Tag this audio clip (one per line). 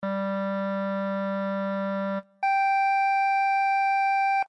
interval
draft
sound